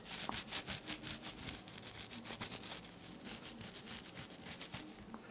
20060614.cheek.scratching.02
sound made scratching my (hairy) cheeks. Sennheiser ME66 >Shure FP24 > iRiver H120 (rockbox) / rascándome mi peluda cara
body, hair, scratching, unprocessed